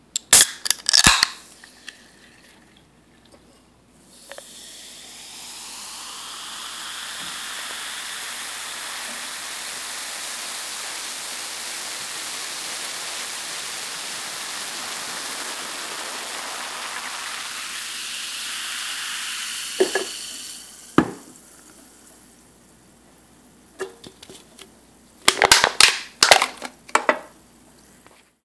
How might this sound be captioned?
Soft drink
Opening a can of soda and pouring it into a glass. There's that initial traditional cracking open sound (almost sounds like bacon sizzling) followed by the fizz. Then the can is placed on a counter and crushed.
Recorded on a Samsung Galaxy S3
beverage, can, carbonated, coke, cola, drink, fizz, fizzy, opening, pop, soda, sparkling, sprite